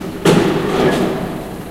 Factory Crane Bang
Buzz, electric, engine, Factory, high, Industrial, low, machine, Machinery, Mechanical, medium, motor, Rev